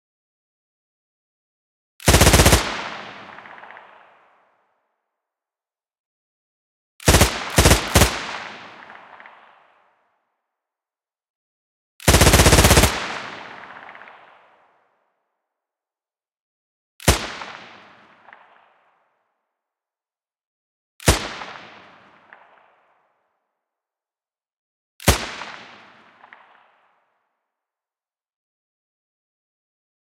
Made in ableton live. Version 1 Light machine gun sound with environment reverb. Processed.